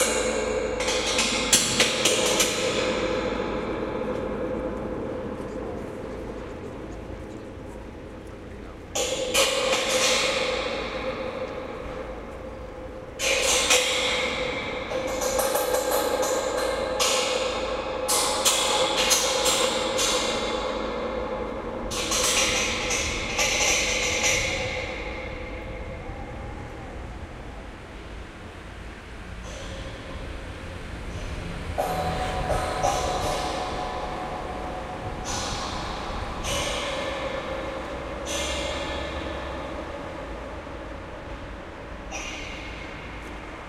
Pushing the microphone against a metal fence and then hitting it. The
resulting sound is mostly the vibrations of the about 30 meter long
fence. Quite spectacular.
metal-fence, field-recording, resonate